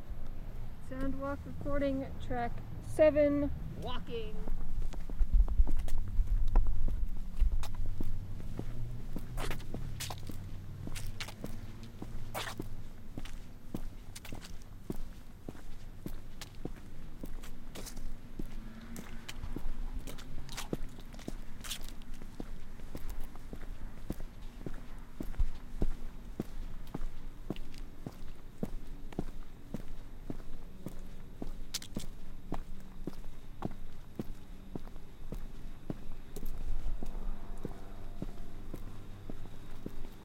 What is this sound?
Sound Walk - Walking
Walking outside down a path at college
college, Walking, outside, pathway, pavement